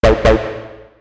hope u like it did it on keyboard :D on ableton